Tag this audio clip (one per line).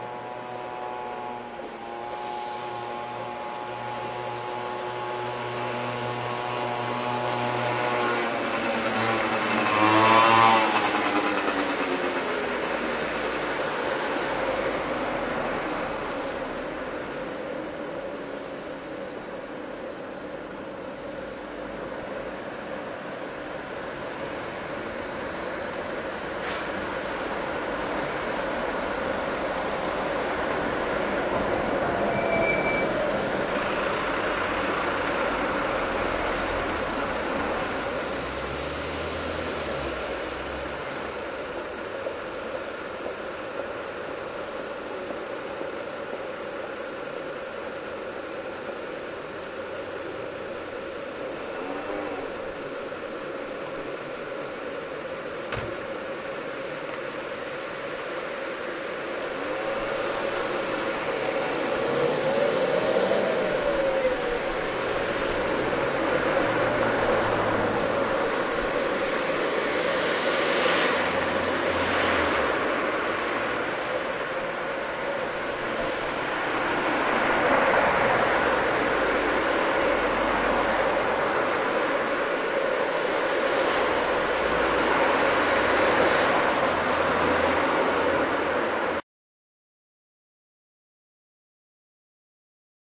bus
car
jam
moped
traffic